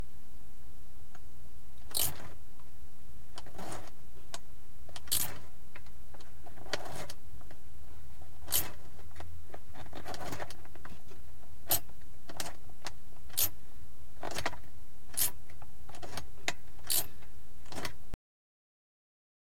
The small dial to adjust gauge backlight brightness on a Mercedes-Benz 190E, shot from the passenger seat with a Rode NT1a. This dial uses a spring-resistor, so what you hear is a needle sliding against an internal spring.
mercedes, rode, field-recording, car, interior, tta, benz, zoom, switch